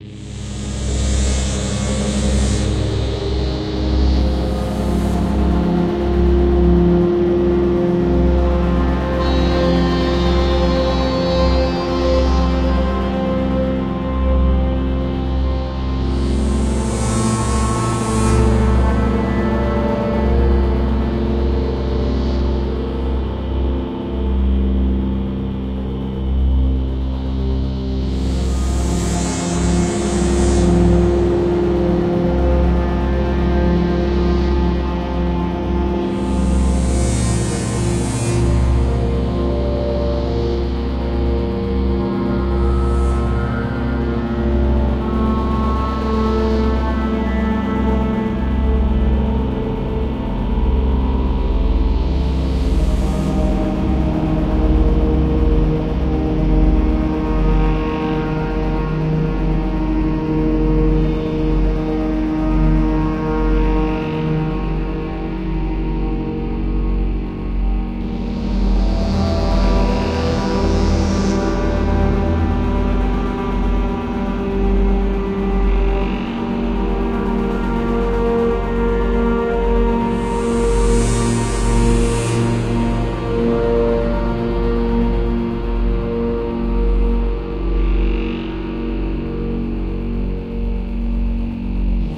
Dark Myst Synth Cyber Poly Drone Sci-fi Thriller Scary Atmo Ambient Cinematic Film Movie Surround